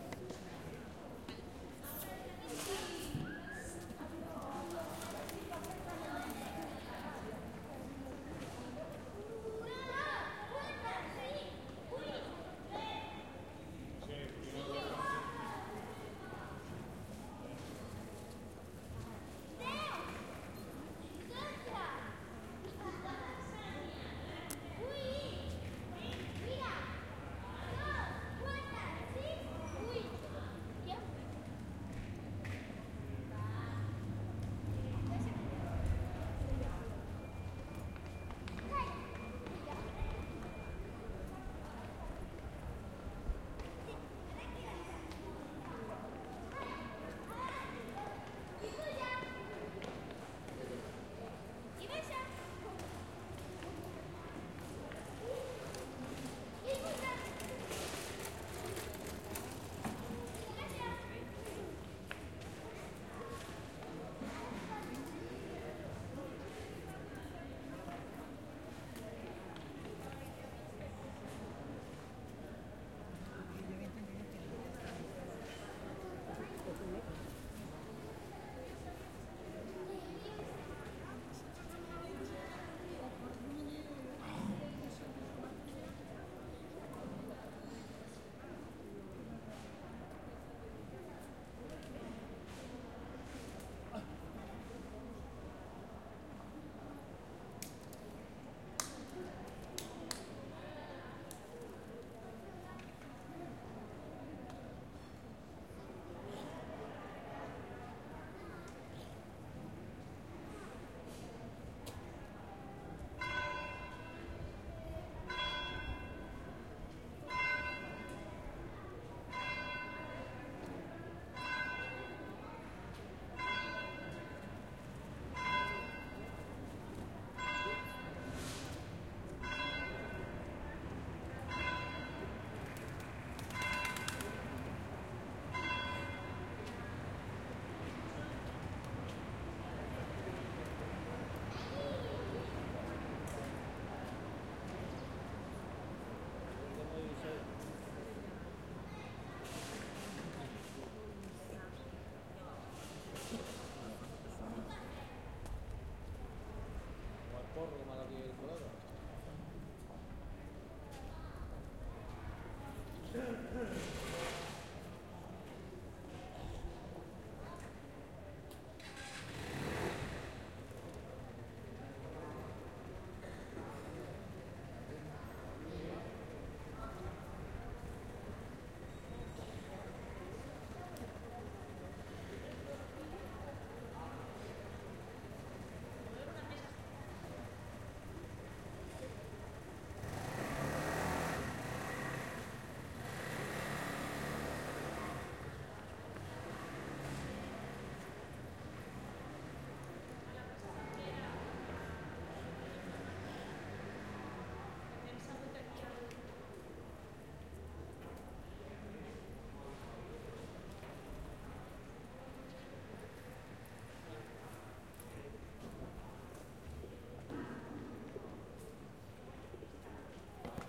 Took recording with H4 Zoom on one of Barcelona's street.